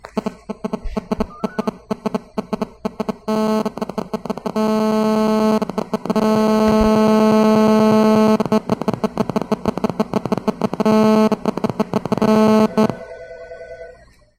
Telephone interference 01
celular, telephone